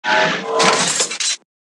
One of a few digital sounds I have been producing for a few projects. I've decided to share a few of them for free. Here you go; have fun!
I made these with a few additive synthesizers, resampled them, and then put them through a granualizer.
computer, digital, electronic, future, granualizer, omnitool, processed, sci-fi, space, synth, synthesizer